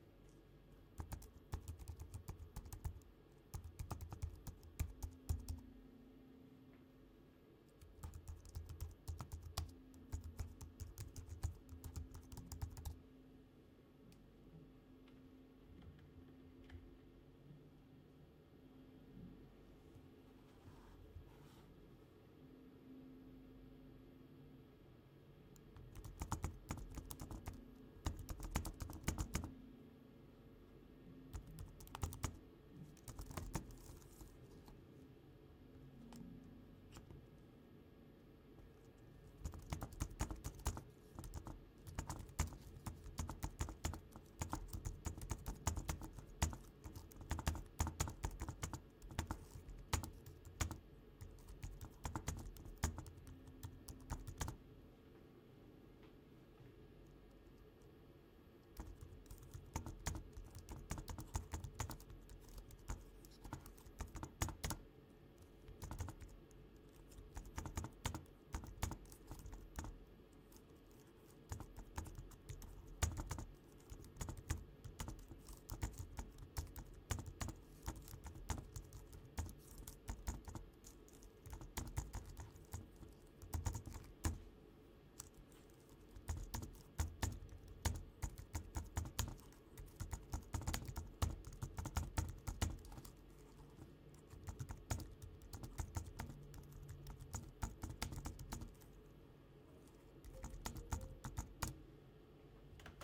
Typing on a imac keyboard